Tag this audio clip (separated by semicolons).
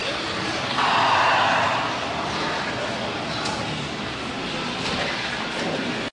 ambiance; arcade; field-recording; boardwalk; ocean-city